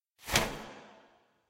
I hope this sound is realistic enough for your projects. Maybe i will add some spotlights in the future